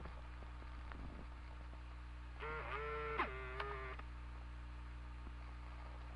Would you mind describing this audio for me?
MacBook SuperDrive init.
H4n
experimental, contact-mic, piezo